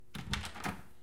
A wood door opening
door-open, door-opening, wood-door, Wooded